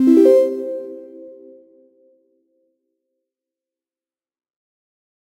alert3 (version 14)
Bleepy musical sequence, created in reaper using reasynth and some jsfx plugins for processing.
alert, application, attention, beep, bleep, computer, cyberpunk, design, game, game-sfx, interface, sequence, space, synth, ui